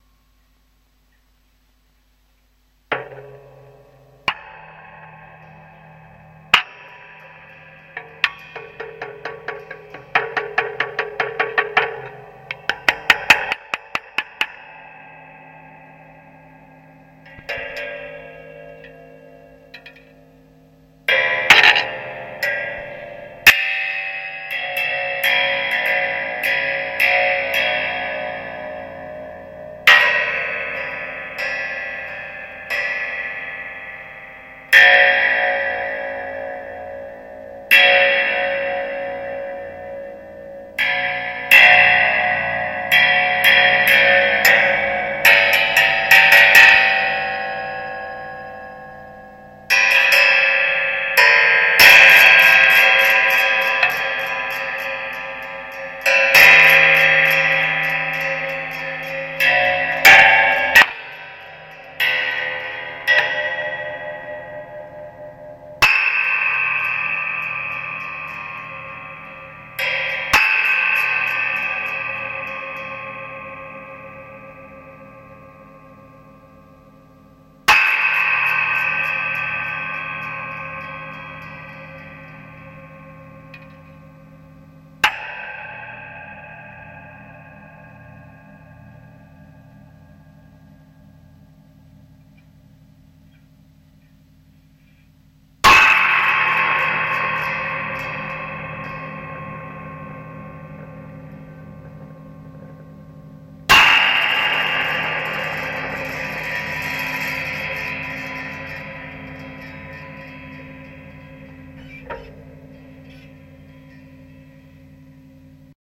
2017 20 03 SPRINGS IR2
Industrial springs recorded with piezos
bang, convolution, fx, hit, impact, impulse, industrial, ir, iron, metal, oscillator, plate, resonate, resonator, reverb, shot, spring, steel, struck